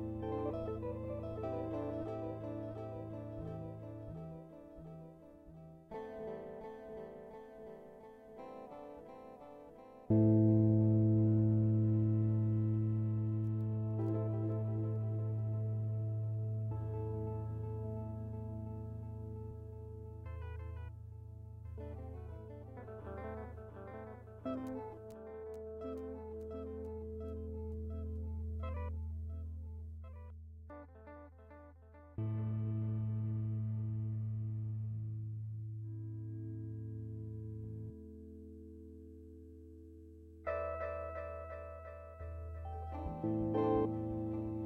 hazy guitar loop
airy, fx, guitar, loop